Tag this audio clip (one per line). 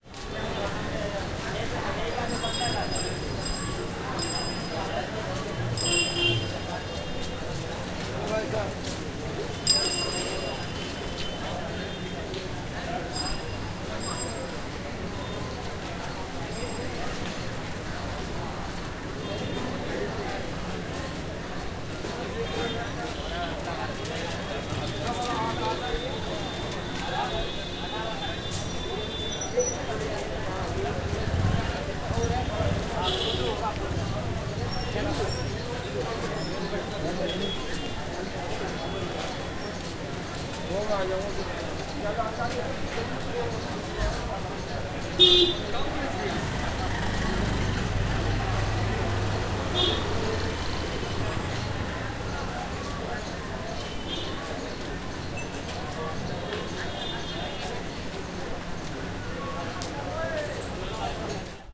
ambience
audiorecorder
busy
delhi
field-recording
h1
horn
noise
olddelhi
people
zoom